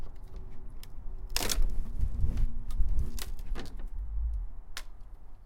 Tearing rotten wood 5a
This is me in the garden tearing away rotten wood from my fence before fitting in new wood pieces.
Recorded with a Zoom H1.
Some nice stereo sounds on this one.